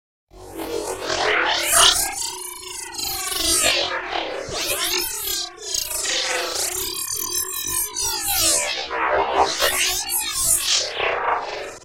additive granular combo 02
Foley samples I recorded and then resampled in Camel Audio's Alchemy using additive and granular synthesis + further processing in Ableton Live & some external plugins.
sfx, abstract, future, sounddesign, soundeffect, alchemy, resampling, sound-design